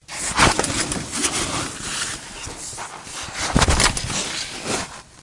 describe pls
A sound effect of pulling curtains